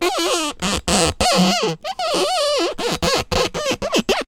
One in a series of eight, rubbing a knife around on some cabbage to create some squeaking, creaking sounds. This might work OK for a creaking door or maybe even some leather clothes. Recorded with an AT4021 mic into a modified Marantz PMD 661 and trimmed with Reason.
creak, door, foley, squeak